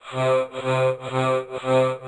generated using a speech synthesis program to simulate laughing. 'ha ha ha ha'. added slight reverb and filtered for high-end noise. added light flange, to lend a little variation to each 'ha' syllable.